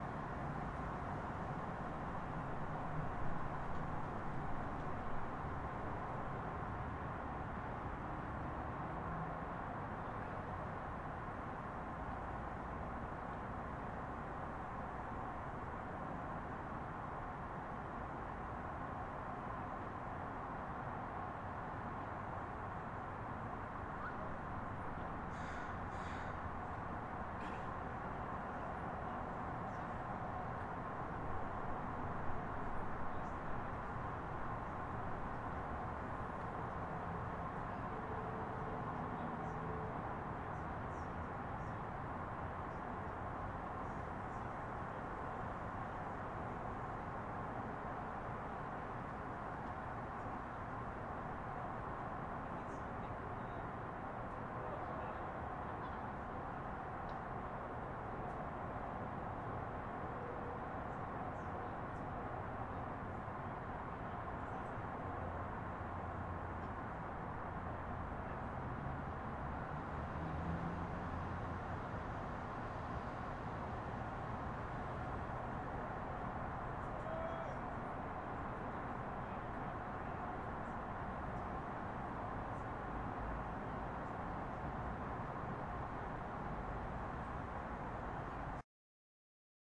Outside Night City: Planes, Cars, Wind
Recorded with MXL 990 hanging from 20 feet high in an alley 2 blocks from the interstate in Seattle's University District at 8:40 PM in the late April